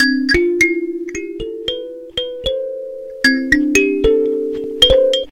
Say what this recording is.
Recorded random notes on a Thumb piano, the result was normalized, finally the sound was compressed using a threshold of -11dB, a ratio of 8.5:1 and an attack of 0.1 seconds